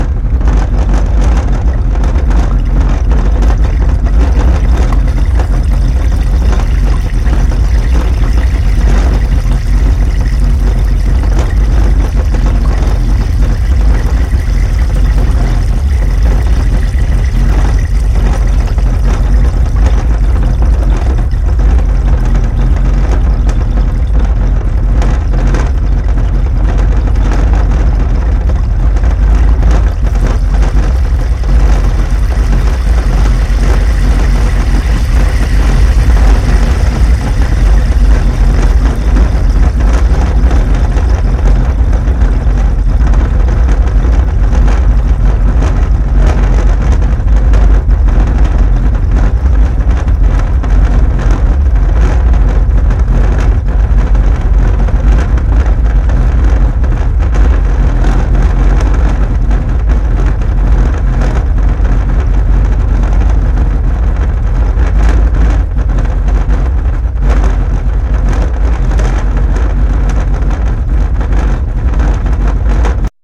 FIRE -A rumble sound, designed and crafted to exhibit consistent clipping, drives a GENELEC 1091A active subwoofer. On top, a 4x385x240-mm plywood board stands on it and is made to vibrate by the air column of the loudspeaker. On theboard, there is a pot containing hot oil, and some water drops are spilled onto it.